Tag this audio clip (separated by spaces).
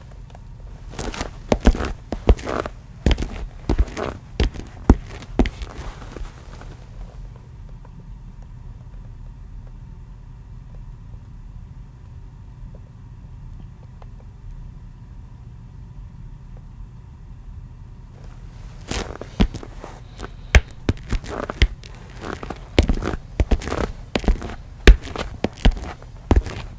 floor tile footsteps